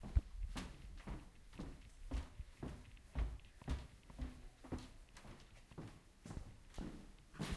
Steps walking up stairs
Steps, stairs, female. Recorded with Zoom H1 with foam windscreeen. Recorded september 2017 Copenhagen during a potcast course on the The Danish National School of Performing Arts.
inside, Steps, building, stairs